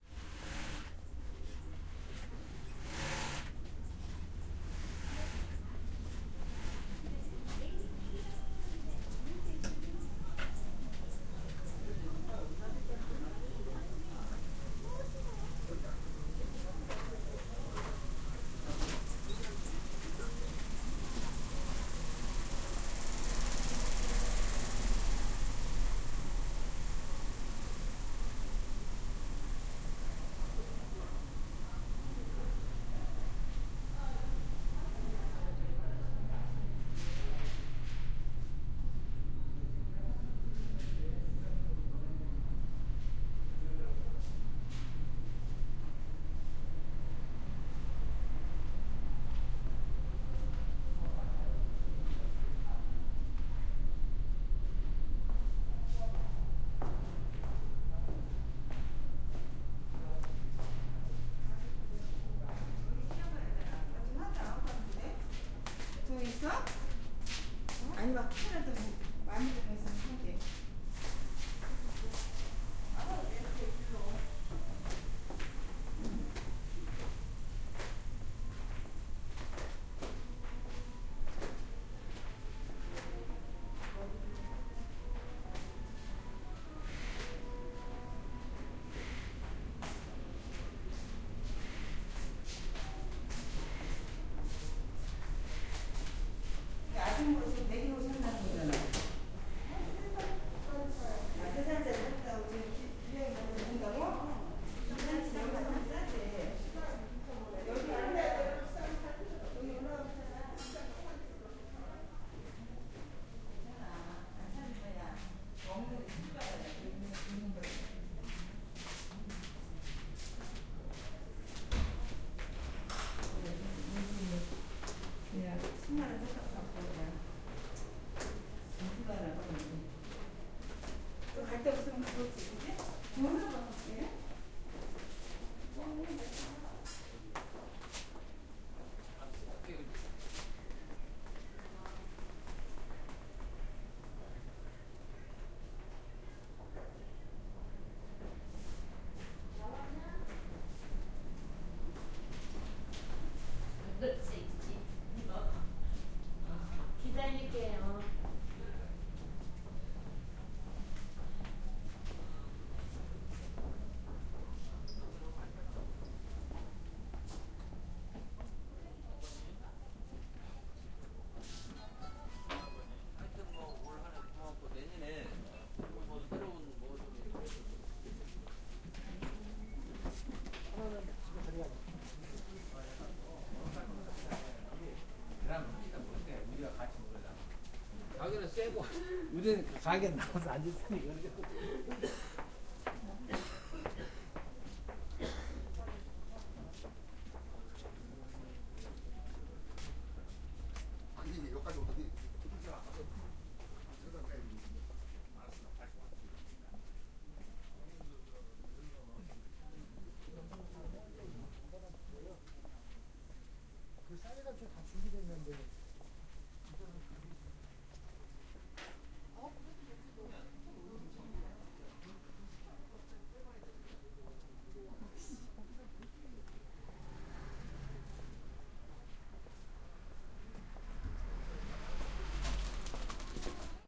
0125 Market clothes makers 3
Machines for clothes. People talking, Korean
20120121